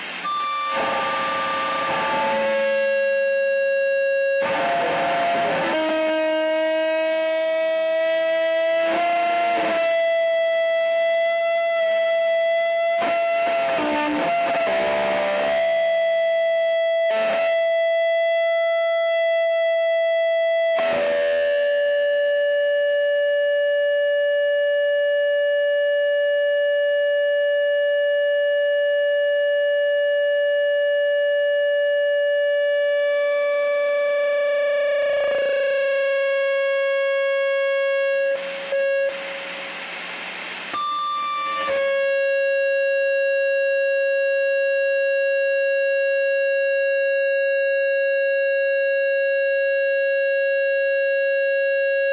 Made for me by Ice Cream Factory Studio in Austin TX: a reverend guitar into a blackstar overdrive into a Blues Jr. Into an SM57 with a transformer mod into a Manley force four preamp into an SSL converter.
electric, mono, guitar